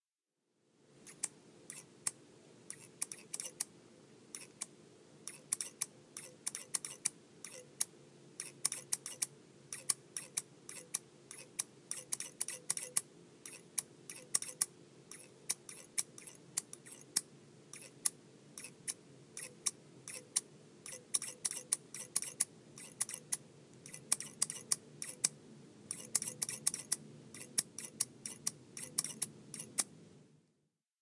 Very sharp haircutting scissors snipping away.
snipping, barber